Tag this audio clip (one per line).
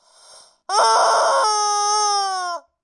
cartoony
honk
honking
scream
screaming
toy